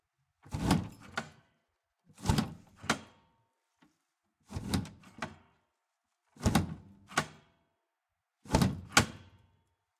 toaster, pushing
pushing, toaster, machine